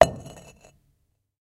stone on stone impact5
stone falls / beaten on stone
strike, stone